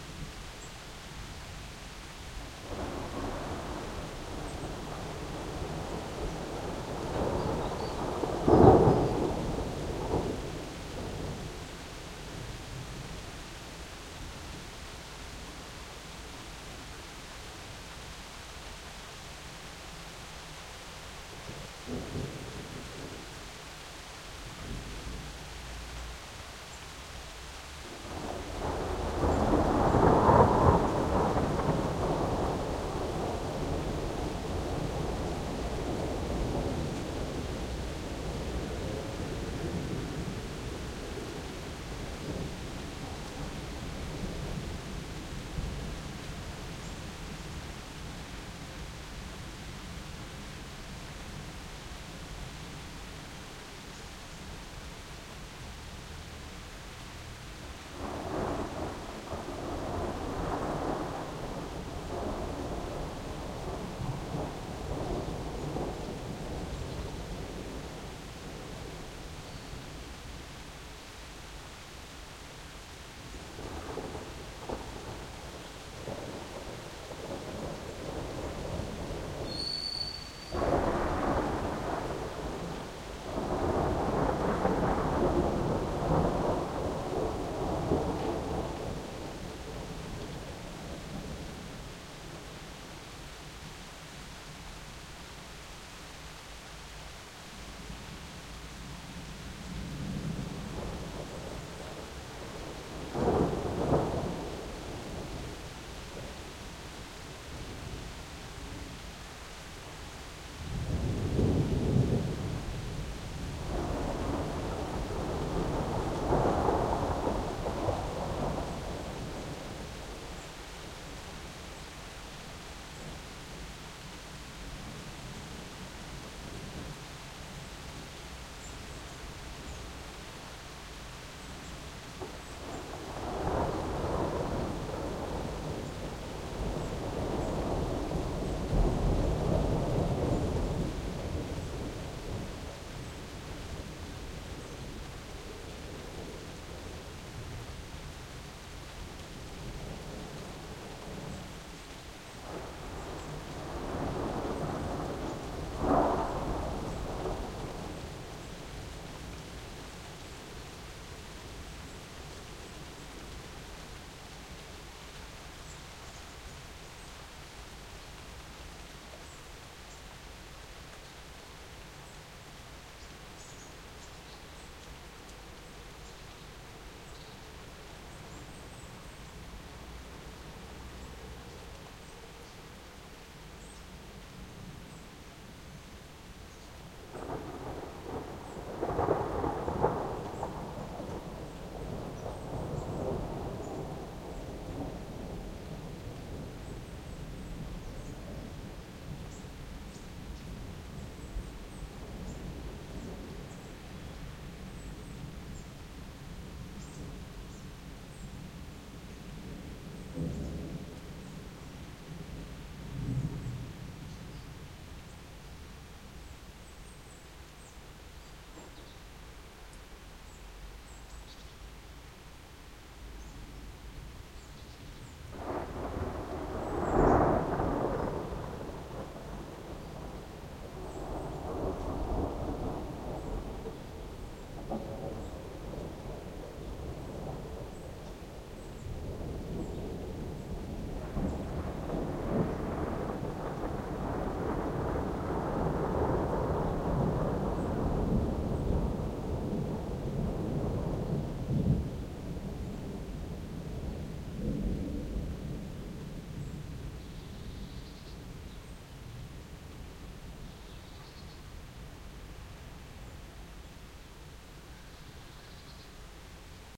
donder21tm30
Part of the thunderstorm that passed Amsterdam in the morning of the 9Th of July 2007. Recorded with an Edirol-cs15 mic. on my balcony plugged into an Edirol R09.
thunderclap, field-recording, rain, nature, thunder